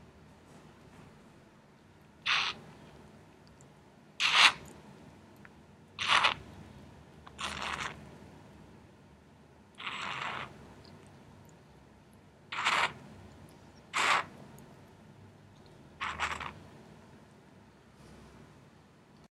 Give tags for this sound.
terere mate sorbos

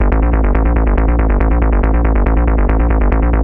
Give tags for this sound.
bass dance processed

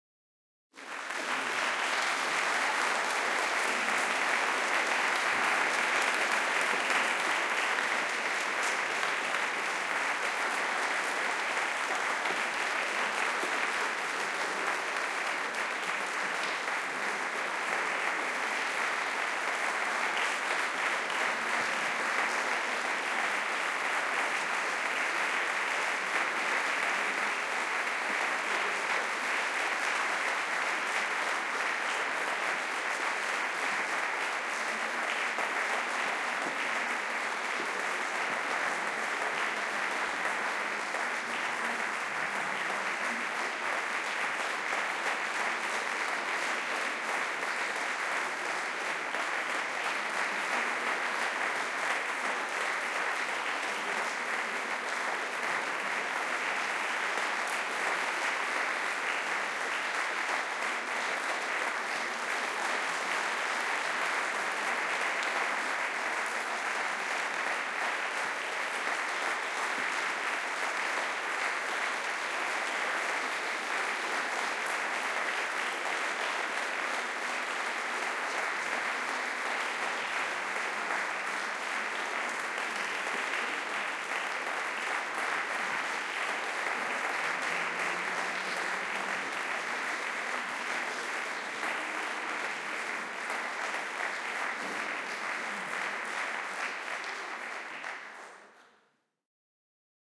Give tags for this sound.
applauding cheering clapping

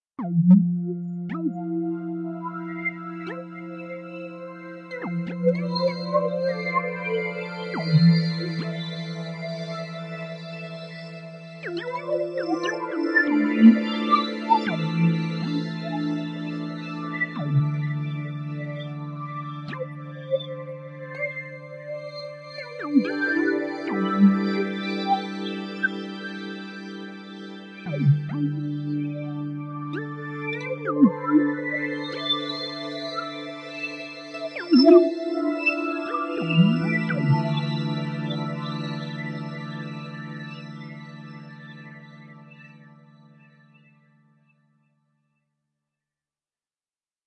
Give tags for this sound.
random sequence synth

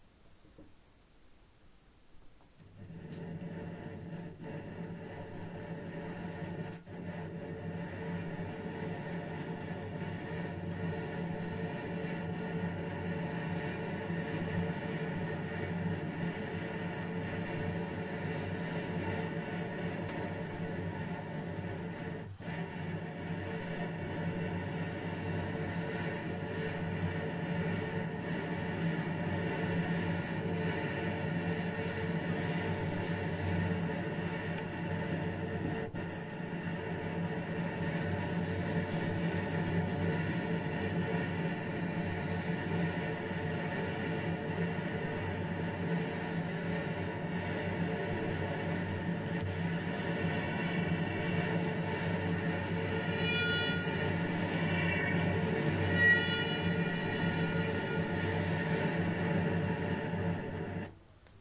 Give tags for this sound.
cello alternative noise bow bridge

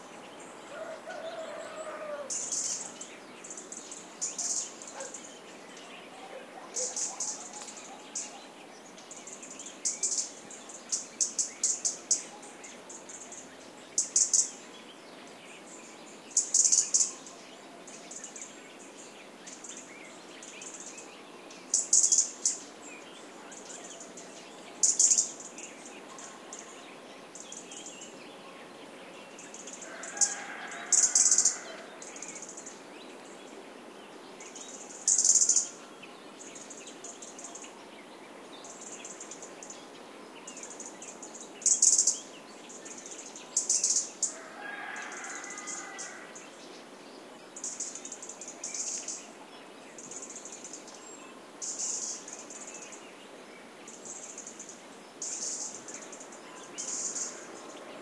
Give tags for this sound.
nature autumn insects birds south-spain mountains field-recording ambiance

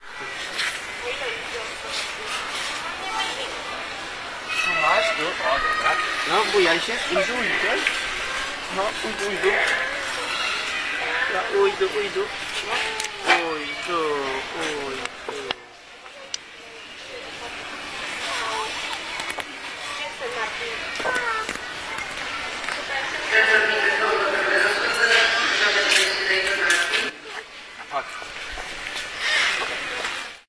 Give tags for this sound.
children voices

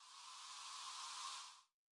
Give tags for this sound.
dubstep sound-fx whitenoise

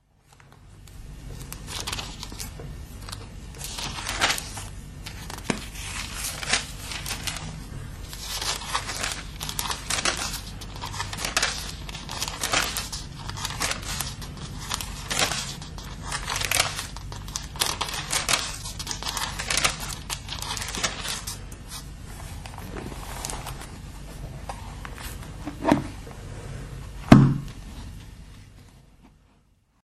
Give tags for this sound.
paper turning-pages book

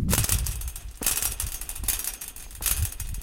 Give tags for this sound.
Essen Germany School SonicSnaps

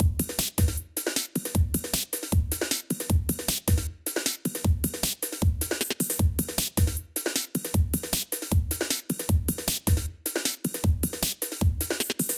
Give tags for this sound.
beat braindance drum-loop electronica free idm

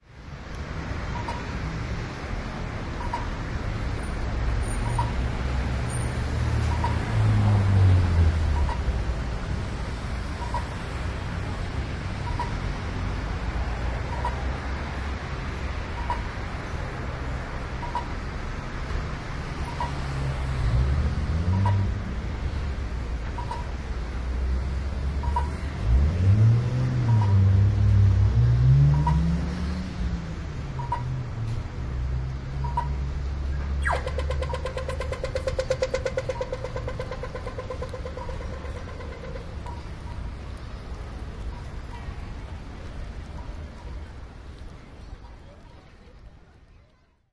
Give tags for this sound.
ambiance beep binaural cars cross-walk field-recording road street walking zebra-crossing

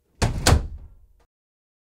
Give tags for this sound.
slamming wooden

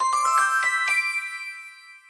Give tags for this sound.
audacity smile funny fun good

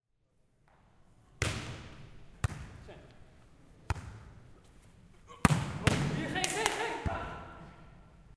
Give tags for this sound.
ball beach beachball

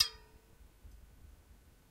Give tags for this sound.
experimental metallic percussion

